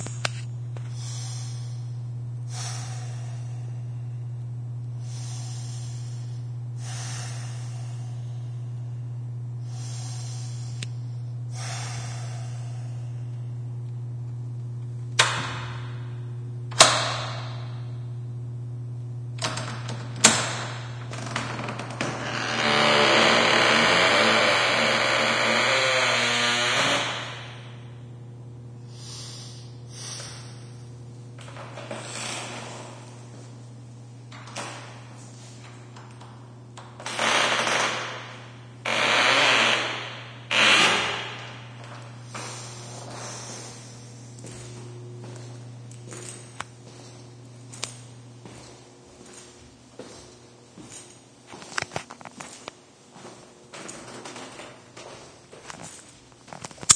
echoing room huge bathroom stall door creak scarey breathing walking
door, echoing, stall, bathroom, breathing, huge, scarey, creak, walking, room